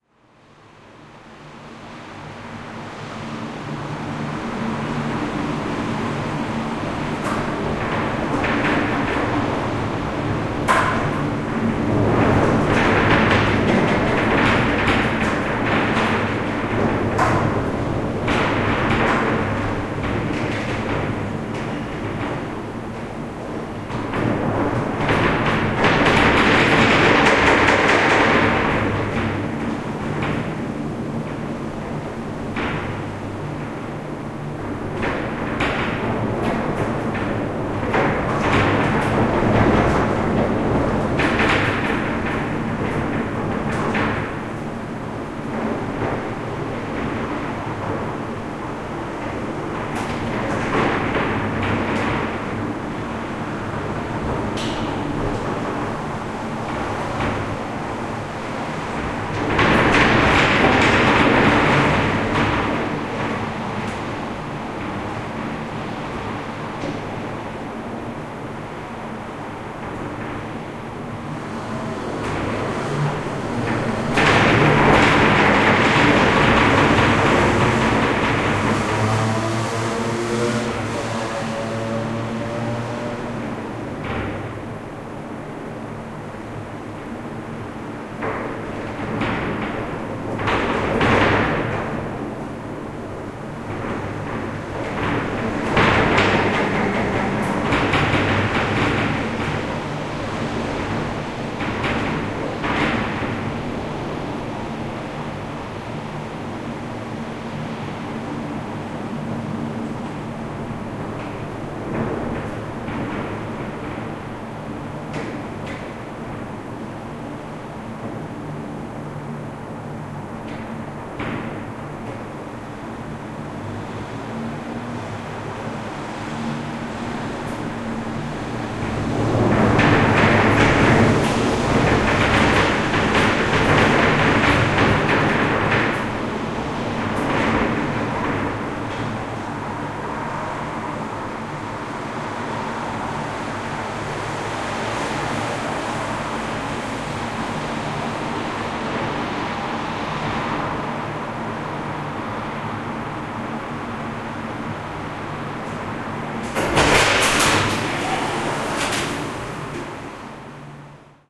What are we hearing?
Wind in city 2
This is recording of wind in city. Recorded at silent evening with Tascam DR-05.